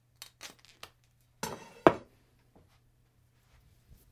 A pack of Rips and Tears recorded with a Beyer MCE 86N(C)S.
I have used these for ripping flesh sounds.
Enjoy!
flesh, tear, rip